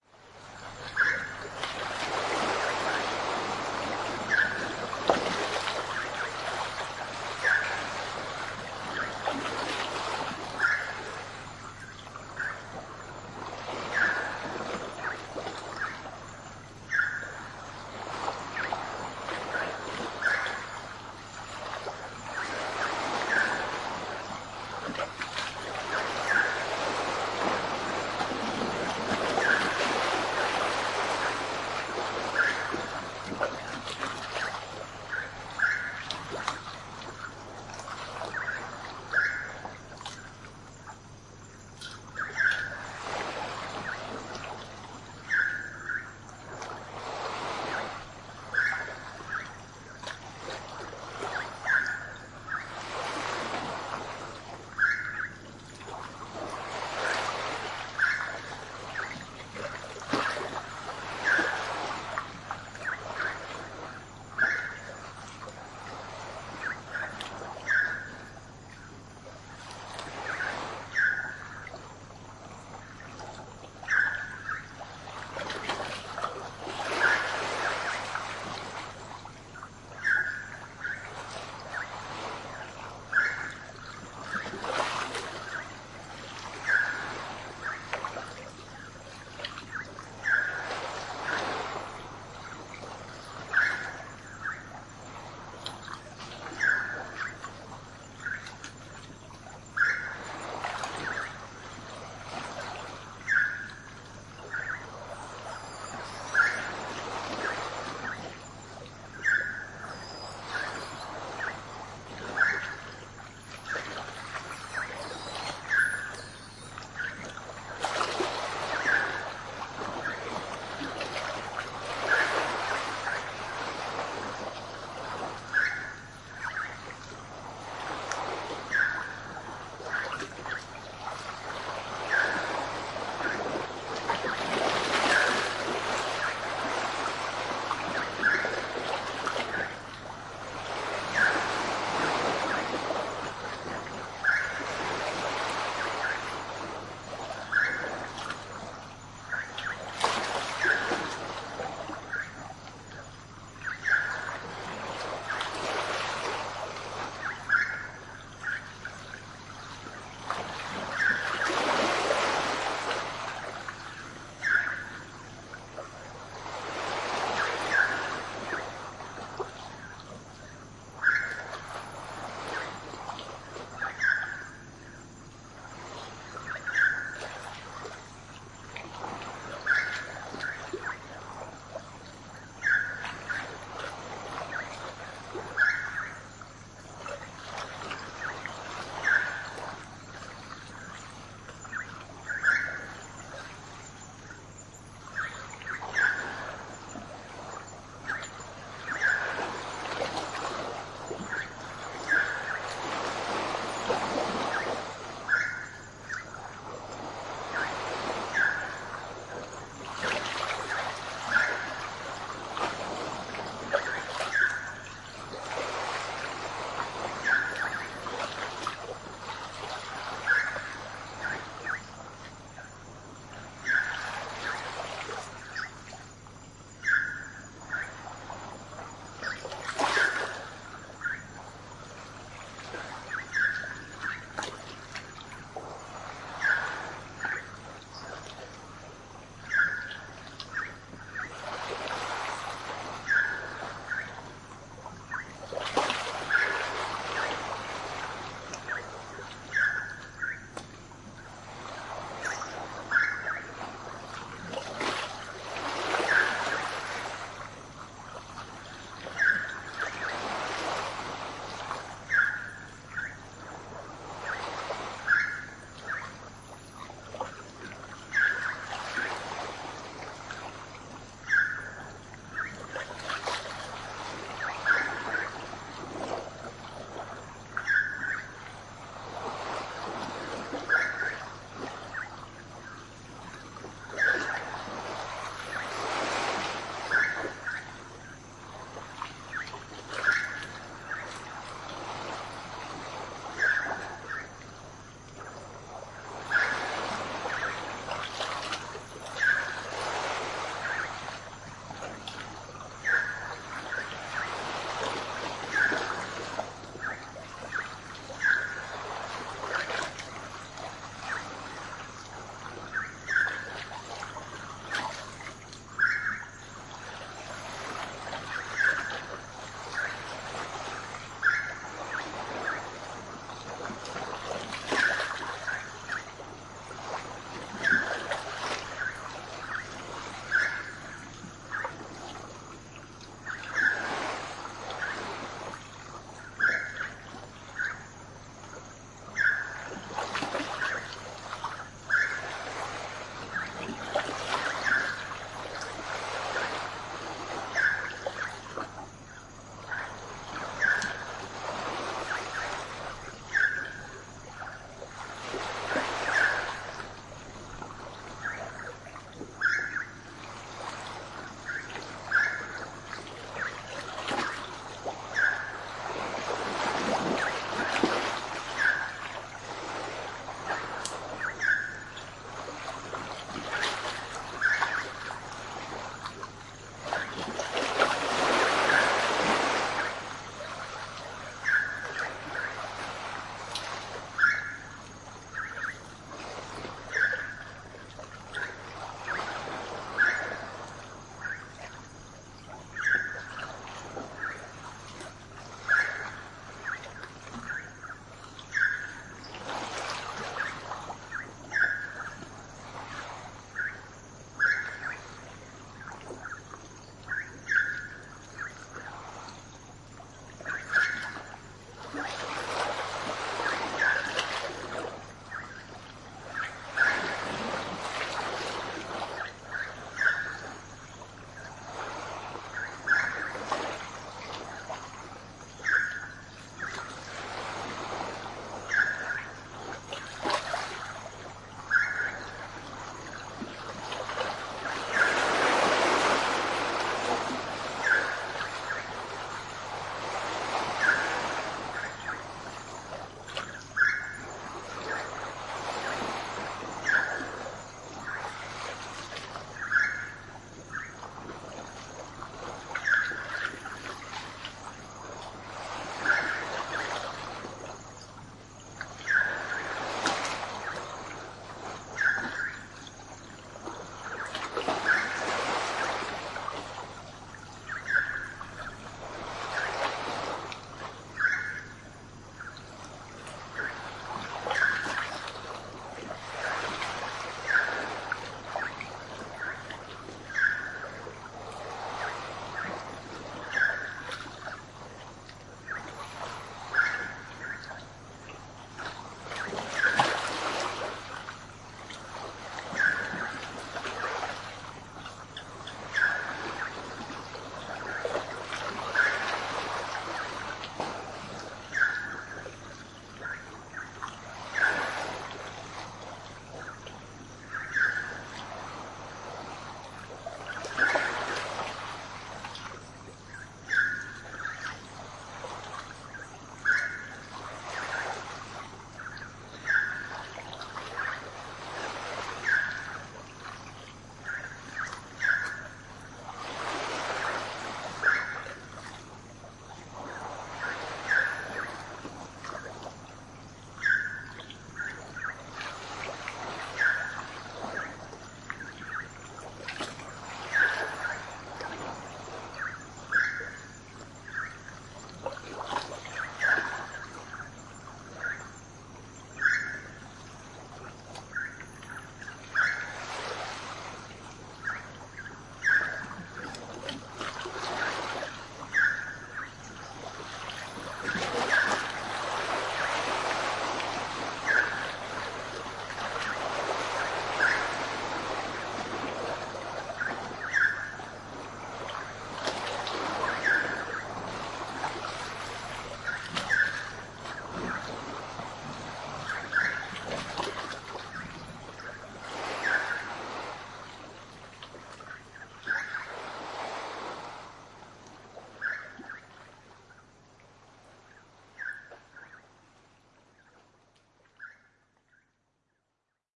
An ambient nigh-time recording of jungle sounds and waves moving over the coral reef made at remote Sawai Bay, Pulau Seram, Makulu (Spice Islands), Indonesia.